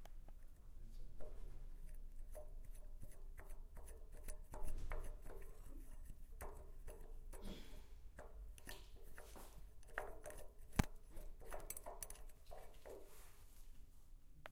Sound recording in and around the house of K.
home, house-recording, indoor